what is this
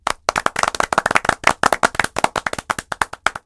applauses claping applaus